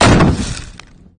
Launching/Shooting Propelled Grenade
Launching a propelled grenade on training grounds.
army, bam, bang, boom, destruction, exercise, explosion, explosive, force, grenade, gun, launch, launcher, launching, military, officer, soldier, training, weapong